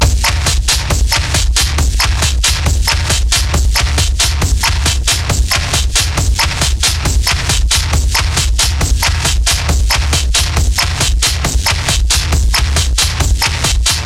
This is my own composition. Made with free samples from the internet, made loops with it, and heavy processing through my mixer and guitaramp, and compressor.
beat, hard, techno, loop, distortion